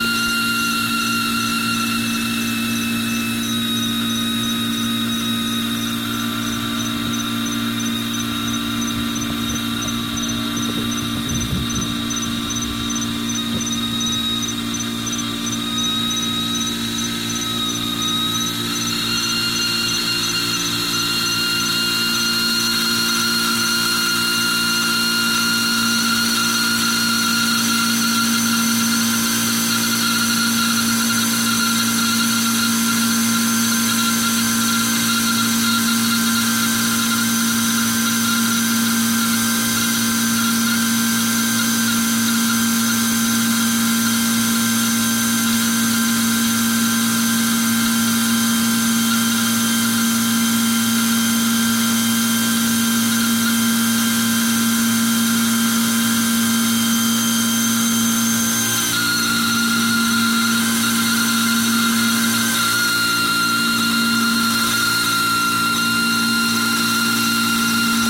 AC Compressor
A field recording at night of a faulty leaking air conditioning compressor with crickets in the background. Easy to loop. Recorded with an old Zoom H4
broken, machinery, machine-noise, AC, leaking, crickets, faulty, compressor, belt, Air-conditioning, defective